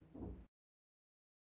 Movimiento Brusco Manos s
movement, Hand, fast